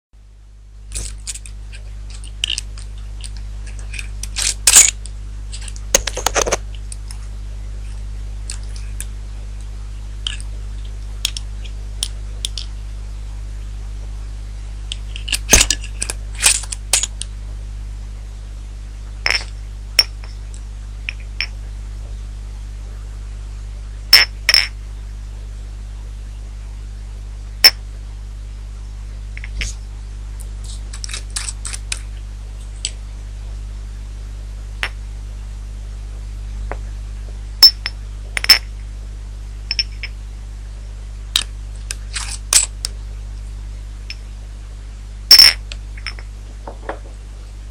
Fiddling with small glass jars.
bottle, bottles, glass